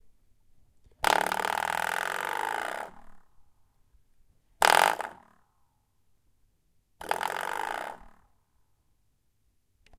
spring door stop
my door stop as the name suggests!